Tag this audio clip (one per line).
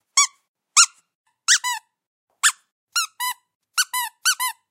animal
cat
childs-toy
dog
funny
play
squeal
toy
whine